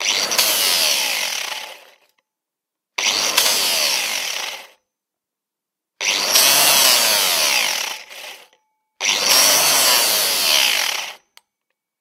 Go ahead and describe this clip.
Angle grinder - Fein 125mm - Stop 4 time
Fein angle grinder 125mm (electric) turned on and pushed four times against steel.